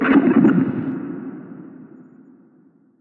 CASIO SA-5 Glitch 5
My beloved Casio SA-5 (R.I.P - burned during duty) after circuit bend. All the sounds in this pack are random noises (Glitches) after touching a certain point on the electrical circuit.